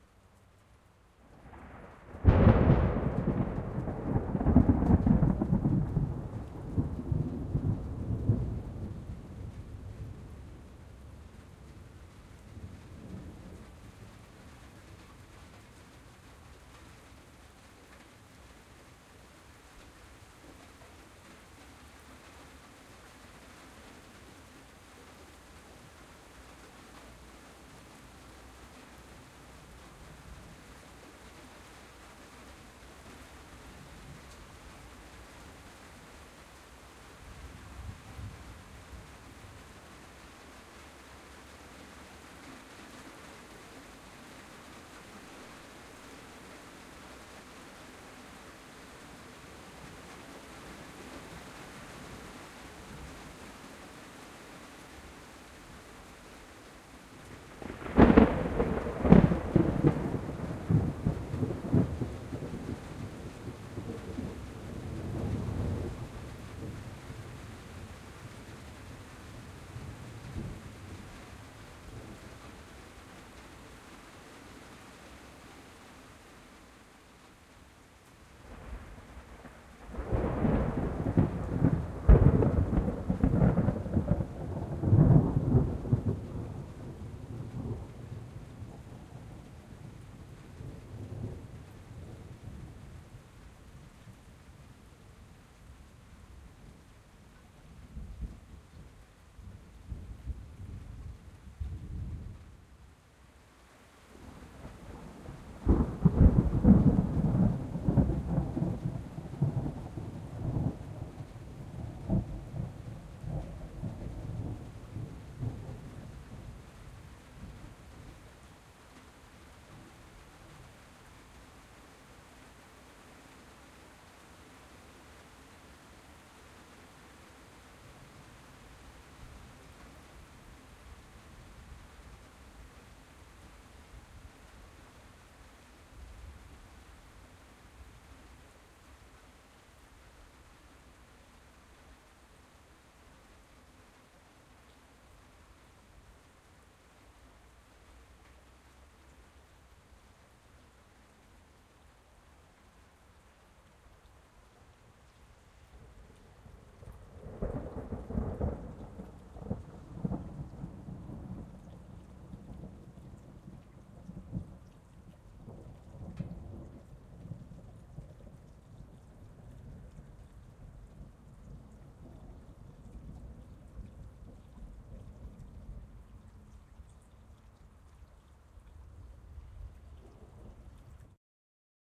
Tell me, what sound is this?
Some thunderclaps and a little bit of rain on tin roof.